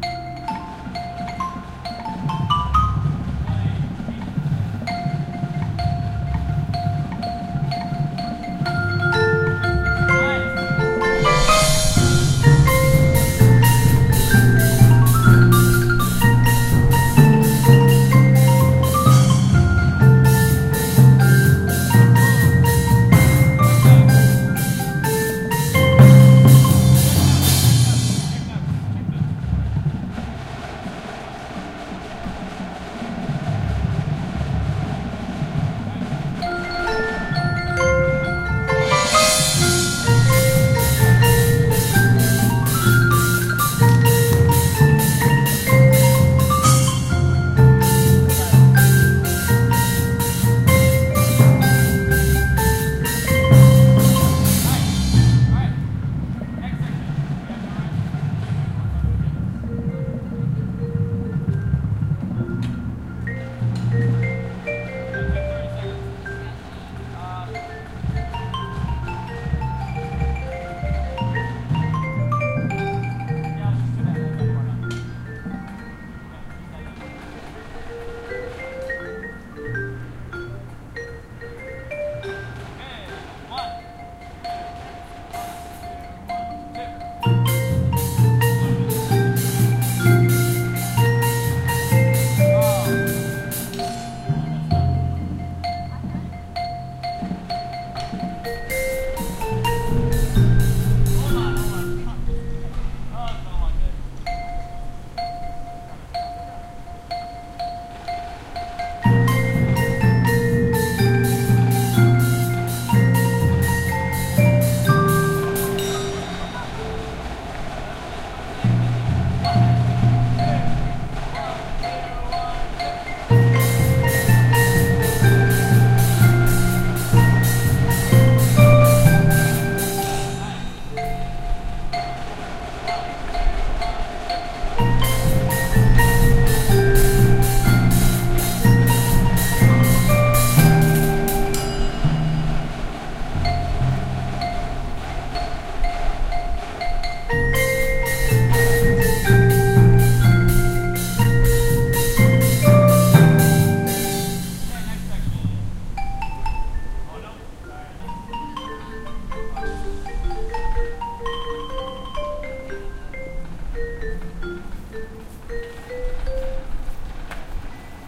Xylophones Practicing There is No Place Like Nebraska
Xylophones practicing the song "There is No Place Like Nebraska". Needless to say, this was recorded in Nebraska. They were practicing for a football game.
ambience, band, band-practice, cacophonous, college, football, like, music, nebraska, no, noisy, percussion, percussive, place, practice, practicing, there, there-is-no-place-like-nebraska, xylophones